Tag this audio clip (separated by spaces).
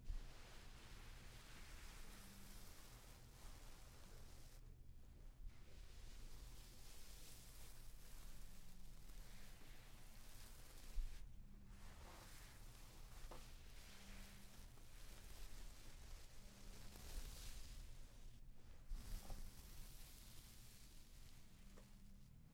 Fall floor sand